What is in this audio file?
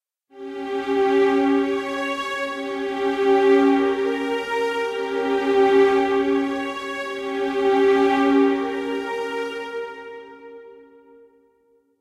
made with vst instruments
drone, ambience, drama, pad, space, dark, suspense, soundscape, ambient, atmosphere, scary, thiller, cinematic, music, trailer, deep, horror, background, dramatic, sci-fi, movie, mood, background-sound, film, hollywood, thrill, spooky